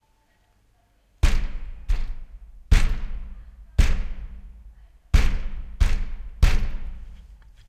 books banging on a door
sound of books hitting a door (from the other side). Recorded for my short film.
bang, banging, book, books, door